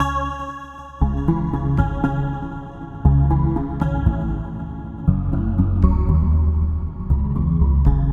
Synth Loop 1
A synth loop at 118.500 BPM.